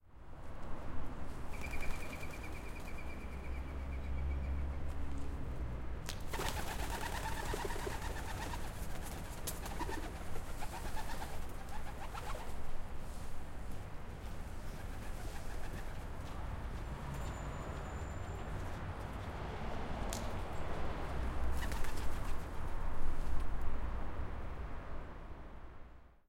antwerpen duiven vleugels
Recorded with Zoom H4N in a pedestrian zone in Antwerp, Belgium.
birds, flapping